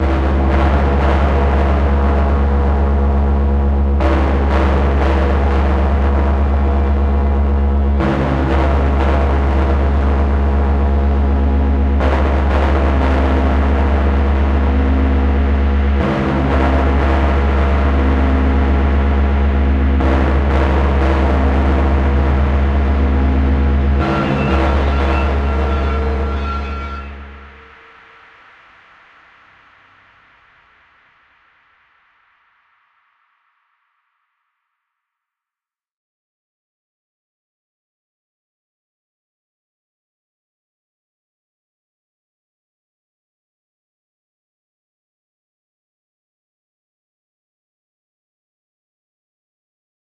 Space ambience: convoy, atmospheric, scary. Hard impact sounds, soft, dark tone. Recorded and mastered through audio software, no factory samples. Made as an experiment into sound design, here is the result. Recorded in Ireland.
space, sounds, industrial, ambient, convoy, heavy, metal, drone, impact, ambience, thriller, screech, wail, cry, dark, scary, hard, guitar, cinematic